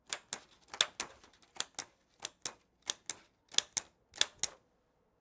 Hole Punching a Paper
Hole, Paper, Punching